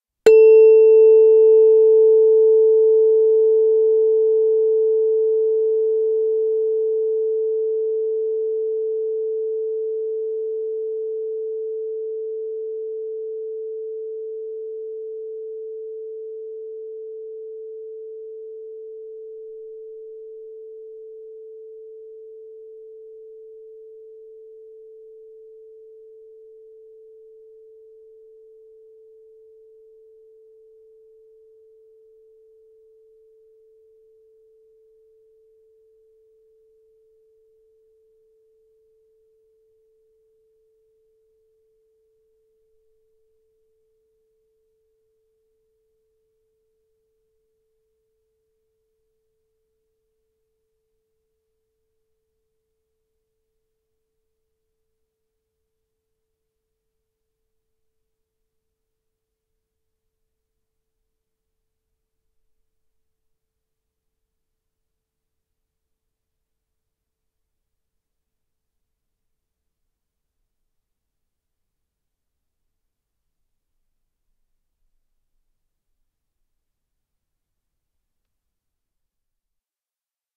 Tuning Fork 440 Hz, Resonance Box
Mono recording of a 440 Hz tuning fork with resonance box, full decay time = more than 1 Minute. I used the measurement microphone K4 by Arnold Esper placed directly in the resonance box. Recorded in the anechoic chamber for analysis and measurement purpose mostly.